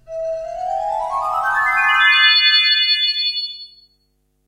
a tinkley synth buildup
build, synth, Tinkley